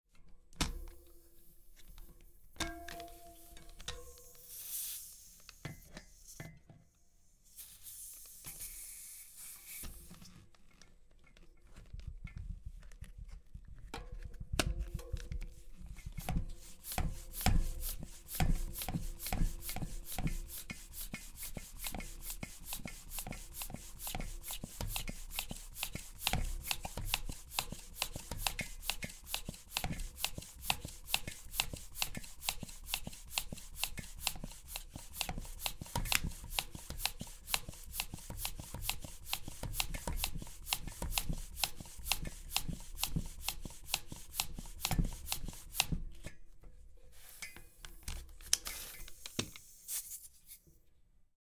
Proyecto SIAS-UAN, trabajo relacionado a la bicicleta como objeto sonoro. Registros realizados por: Julio Avellaneda en abril 2020